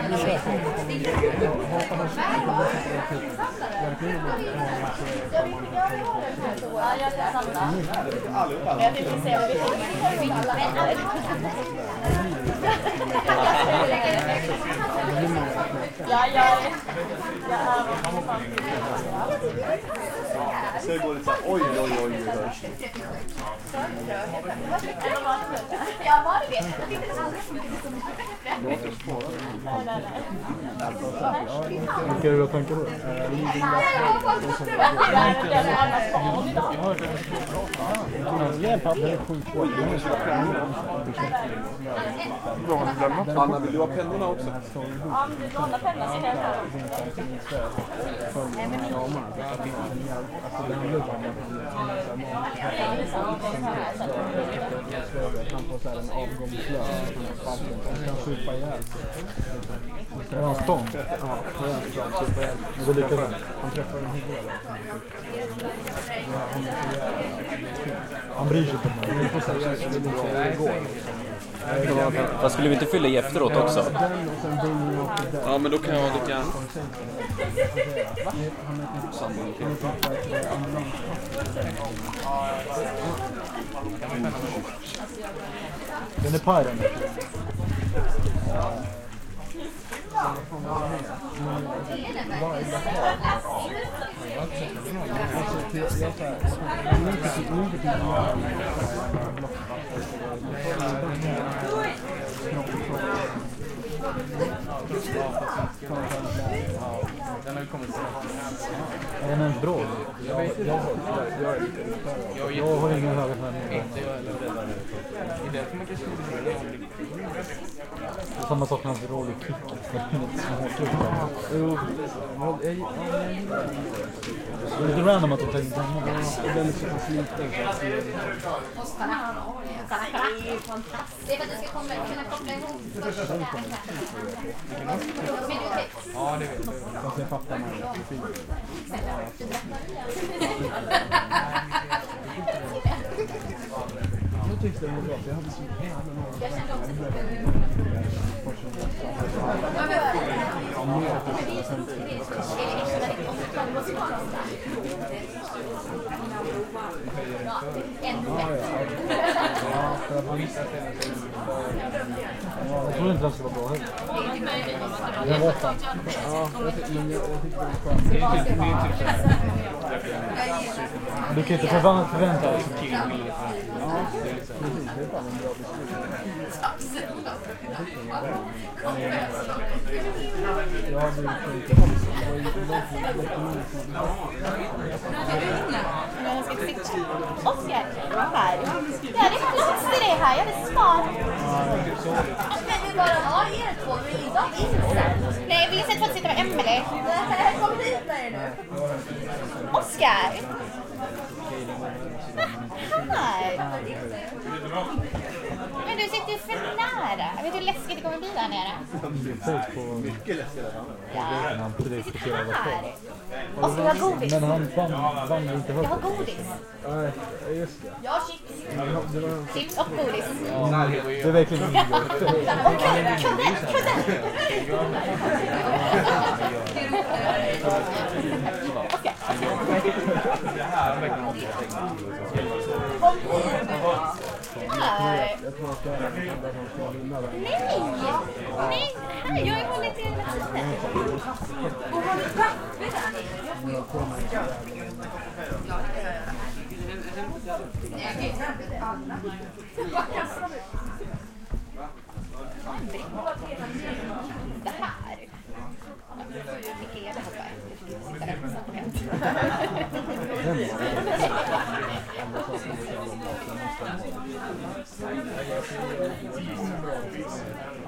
Ambience schoolcinema
Ambiance in the cinema at our school.
school, people, cinema